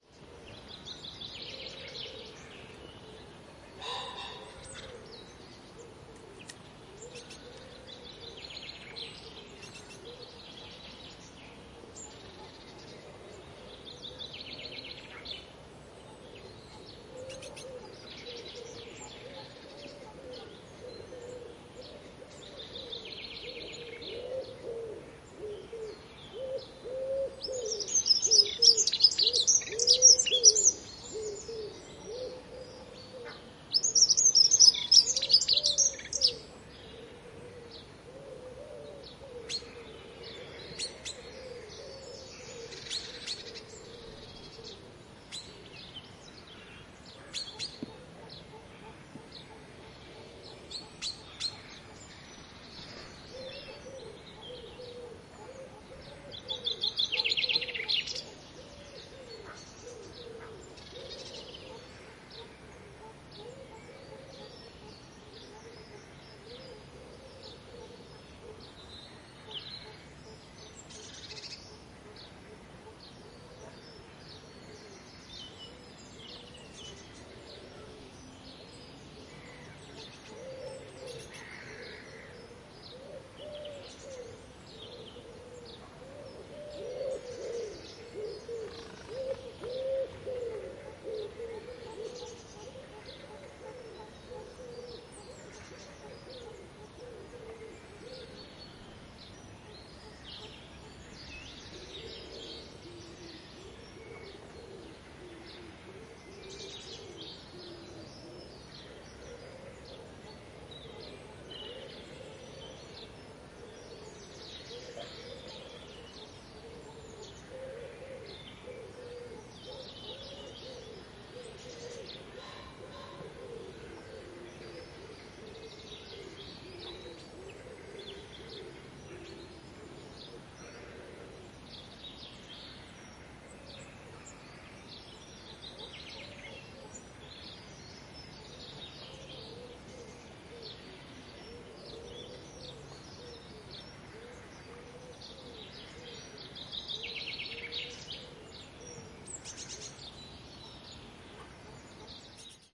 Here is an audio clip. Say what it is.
Morning birds in Fife, Scotland
A very quiet morning recorded 5th March at 09:00 am in Fife, Scotland.
There was hardly any wind which is unusual here at the coast. So it was easy to record with my Olympus LS-12 and Rycote windshield.